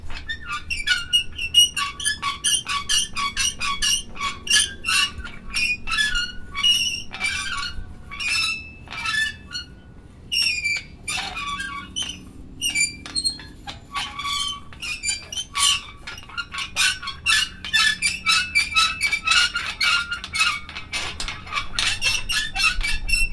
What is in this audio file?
Sonido de robot viejo y destartalado andando.
Solo para carcaza, no motor.
24-48